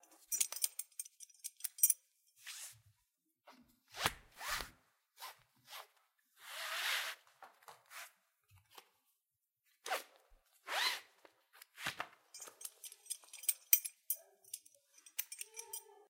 straps-surfing
Foley of fooling around with a surfing strap, both the buckle sound and the belt runnning.
strap, tighten, car, belt, straps, attach, buckle, band, rinkle, girdle, roof, surfboards, surfing